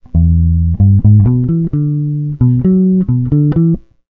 rick-tripper
A Rickenbacker 4003 playing a melodic lick. I played the bass connected directly to a MOTU 828MkII. I recorded using Logic running under OS X 10.10 Yosemite on a Mac Mini. I used Fission to trim the beginning and ending and perform normalization.
Note: this is a replacement for sound #328627 which I had mistakenly uploaded as a stereo file.
bass-guitar, fission, logic, MOTU-828mkII, rickenbacker, rock